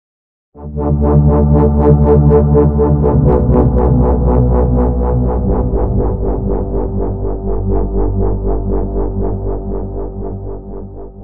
006 wobble melody
Here is a sub bass sample generated in SC
supercollider, wobble, low